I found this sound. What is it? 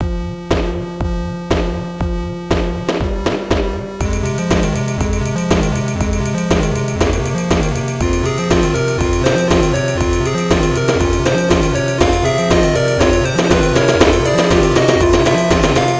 Sonic 500 Act 1 Zone 1 OST (Fire and Flames Zone)
Created August 11, 2019 in LMMS, Audacity, and with a Yamaha Clavinova and an Arturia Minibrute. 120 bpm.
Note: There is no Sonic 500. I made that title up.
Enjoy.
soundtrack, lmms, vibe, electronic, funny, loop